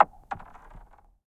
this sample is part of the icefield-library. i used a pair of soundman okm2 mics as contact microphones which i fixed to the surface of a frozen lake, then recorded the sounds made by throwing or skimming several stones and pebbles across the ice. wonderful effects can also be achieved by filtering or timestretching the files.
chill, cold, crack, field-recording, ice, impact, lake, winter